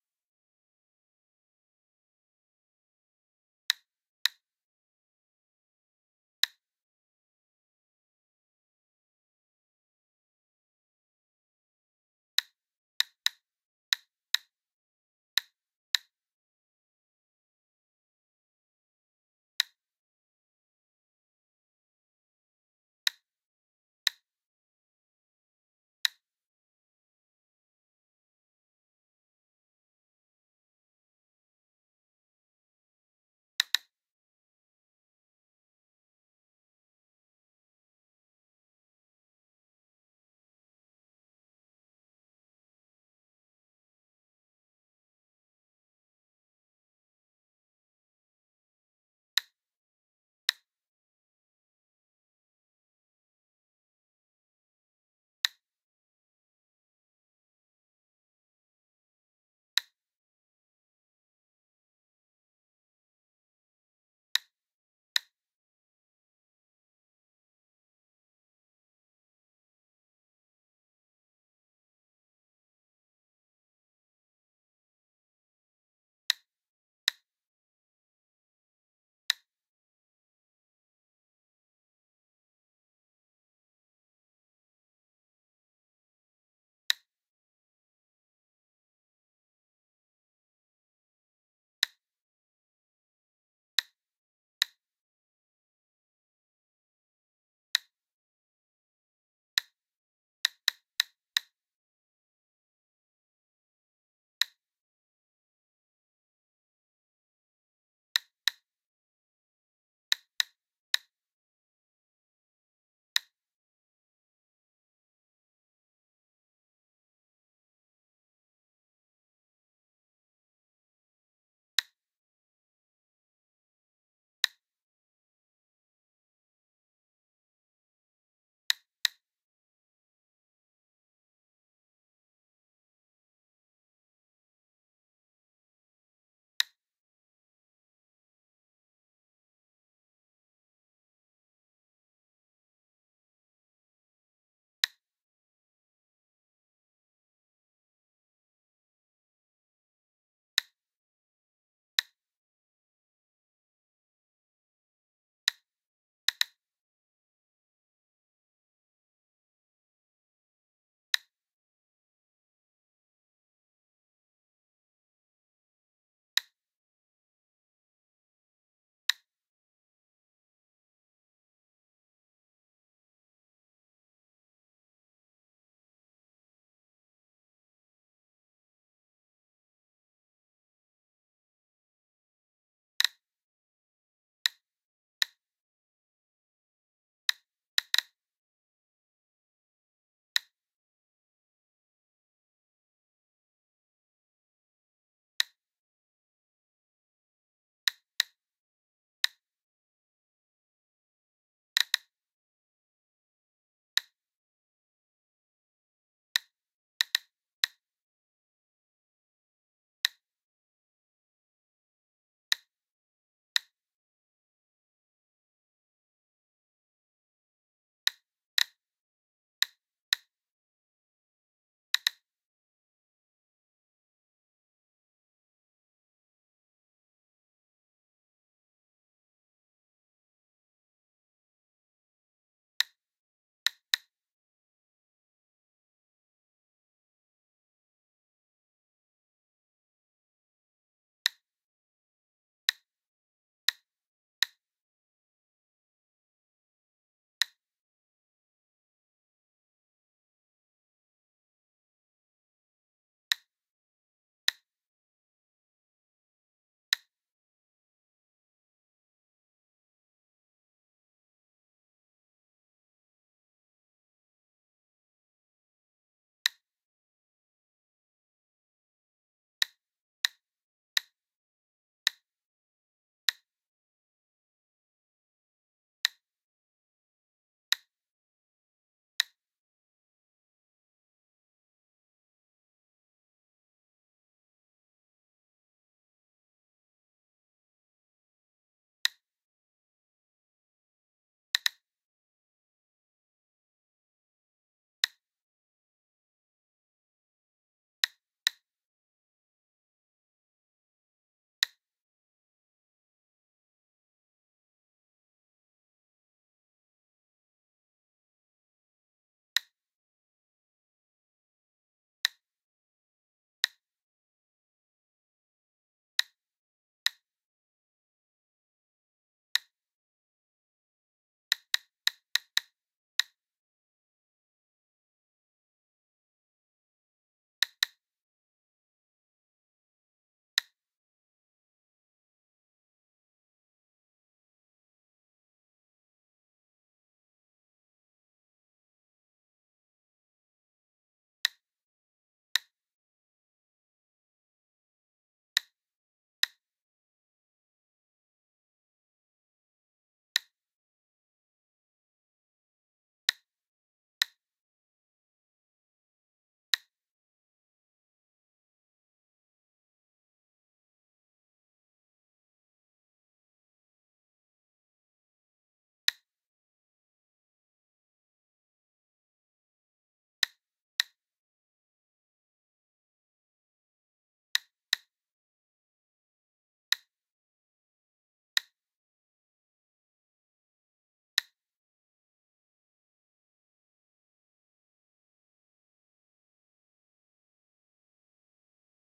Geiger Counter 0,13 µS/h (Normal Background Radiation)
This is a recording of a real Geiger-Müller-counter, detecting normal background radiation. The reading of the Geiger-Müller-Counter was averaging at around 0,13 µS/hour (read: "micro-sieverts per hour").
The recording was taken with two small-diaphragm condenser microphones in XY-configuration. The recorded signal was processed with a noise gate, to eliminate background hiss. No further processing was applied.